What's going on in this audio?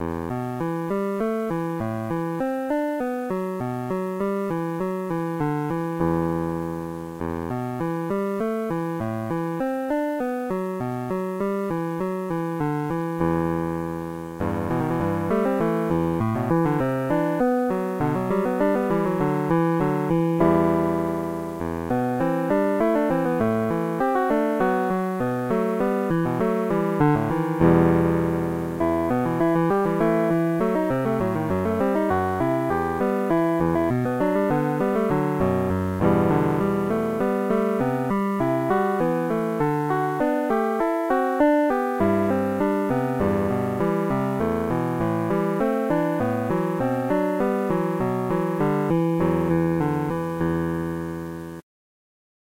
Enigmatic Polyphony
16-bit, 8-bit, 8bit, game, indie, Loop, Melancholy, music, mystery, theme, Video-Game